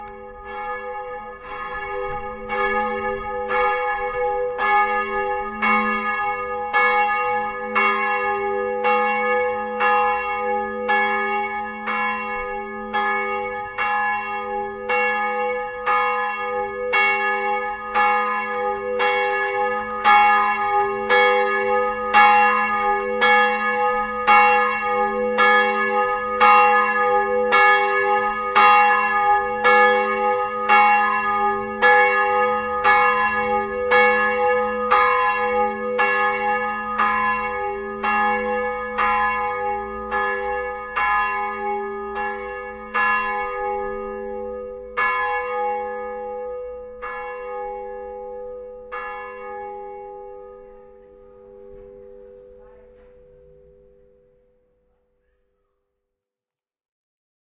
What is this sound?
Churches of Ohrid 1
Churchbells in Ohrid Macedonia
field-recording, ohrid